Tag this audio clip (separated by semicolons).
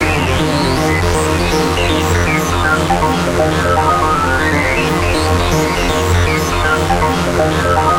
bass; dance; electro; electronic; synth; trance